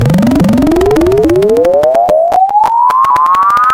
Alternate sine wave created and processed with Sampled freeware and then mastered in CoolEdit96. Mono sample stage seven- reminiscent of the old computers in black and white sci-fi movies calculating at speeds in excess of 5 hertz!